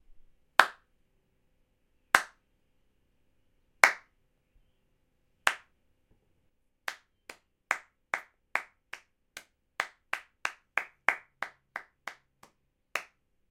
This is the sound of someone clapping their hands softly. Recorded with Zoom H6 Stereo Microphone. Recorded with Nvidia High Definition Audio Drivers. The sound was post processed to reduce background noise.